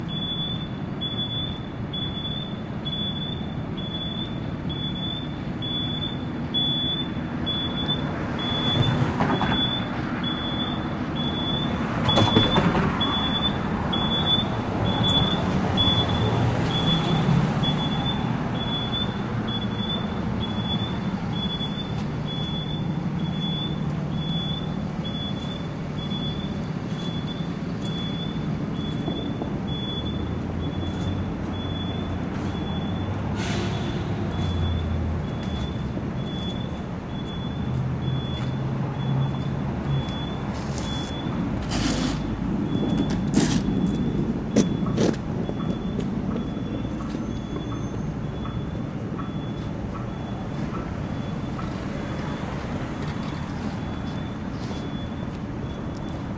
Downtown LA 03
One in a set of downtown los angeles recordings made with a Fostex FR2-LE and an AKG Perception 420.
angeles, people, traffic, field, los, downtown, ambience, city, ambient, street, urban, field-recording